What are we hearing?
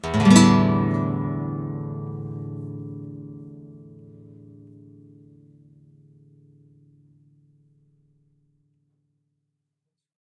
Flamenco Open Strings 2
Flamenco guitarist plays the open strings.
strings, chord, stereo, acoustic-guitar, instrument, nylon-strings, open-strings, flamenco